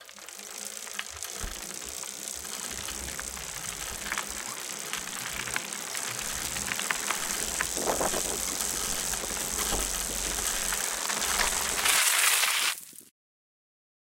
Brake Gravel Med Speed OS
Mountain Bike Braking on Gravel